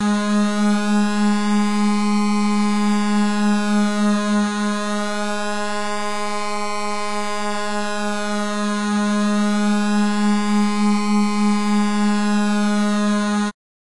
Cool Square Gs3
Gs3 (Created in AudioSauna)
synthesizer, synth, square, analog